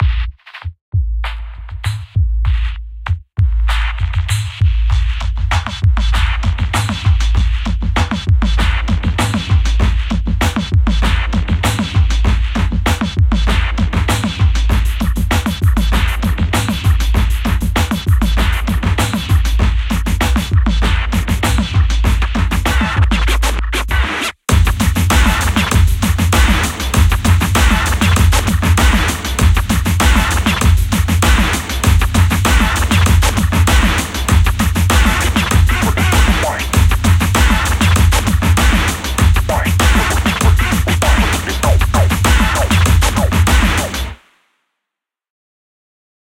caravan break 98 BPM
It's a break for my pack "beats 'n stuff" although it's only 98 bpm, it pumps good. Remember that the little play icon on this site plays a preview that is in lesser quality than the sample (took me awhile to figure that out *genius*)
Technote.
Had the samples loaded from Canvas break and thought that the night was young. And this is the result. Starts out kind of industrial, goes over to a four on the floor with some scratch to make it sparkle.
beat, dance, groove, scratch